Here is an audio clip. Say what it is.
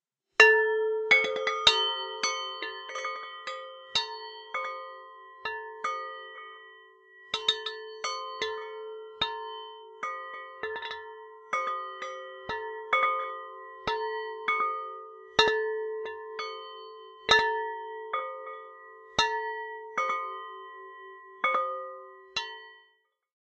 Chime sounds. Made by pitchshifting taps on wine glasses. Recorded onto HI-MD with an AT822 mic and processed.
chimes, meditation, bells